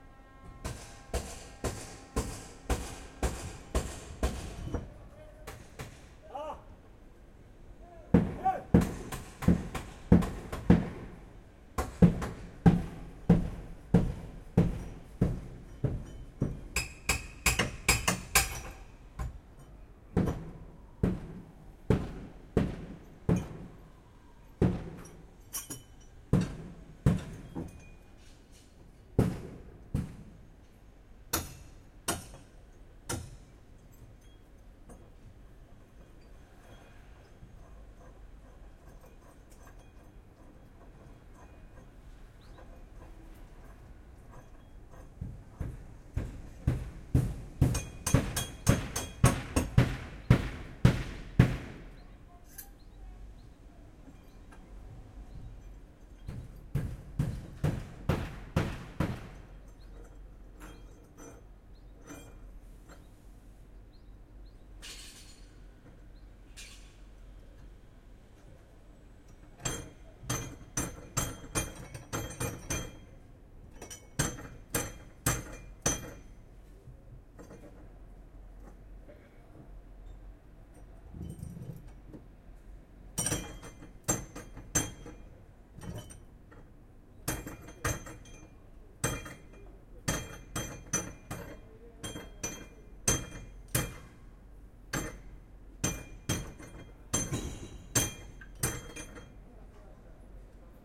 Construction site with hammering and banging noises
Banging and hammering outside in a construction site. Some close and some far from recorder. Recorded on a Zoom H5 with the internal XY mic.
building, up, metal, work, hammering, distance, hammer, machine, noise, constructing, banging, city, close, distant, site, construction, heavy